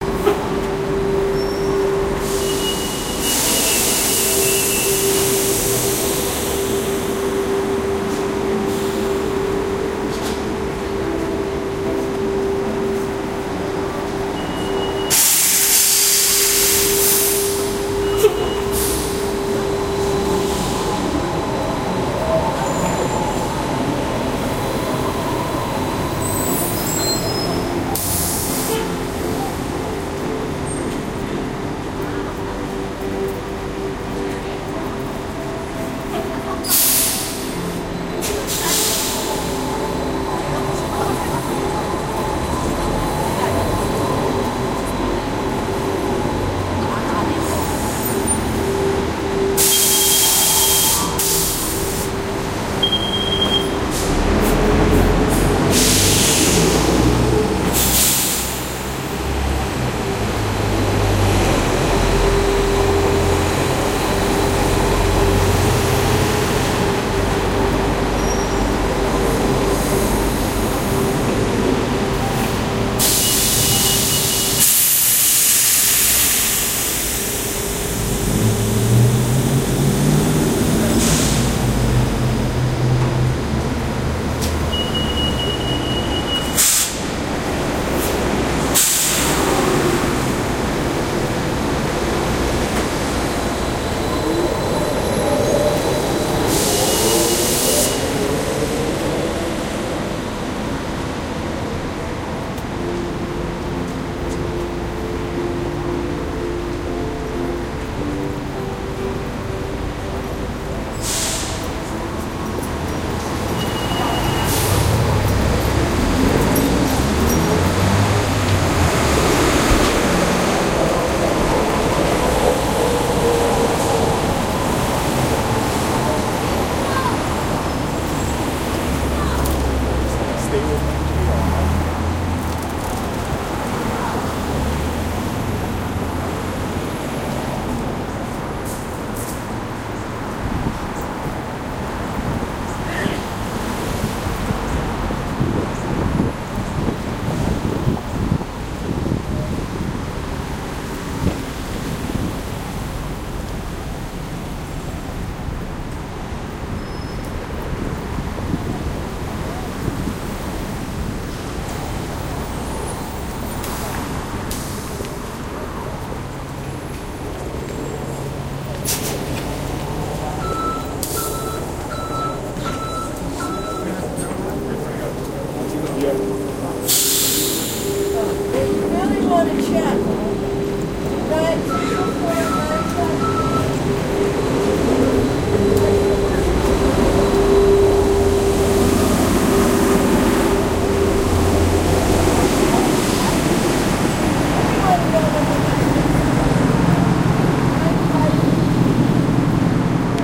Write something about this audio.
This is a recording of the ambiance of Downtown Vancouver BC in Canada. Taken with my Sony stereo recorder. Enjoy.